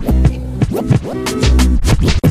92bpm QLD-SKQQL Scratchin Like The Koala - 002 Triptime
record-scratch, turntable